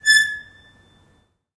Gate Squeak 03
Gate Squeaking, Variation 3 of 3.
close, door, gate, house, metal, open, squeak